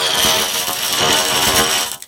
recording of electrode welding noise of a tube
industrial, metal, tools, tube, weld, weldind